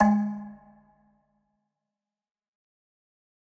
Metal Timbale 021
drum, god, trash